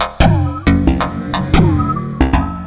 Done with Redrum in Reason
electronic, percussion, reason, redrum, wavedrum